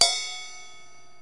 crash 3 bell 2
This is a crash from another 12" cymbal.
hit differently
rock, crash, techno, loop, live, bell, loops, cymbal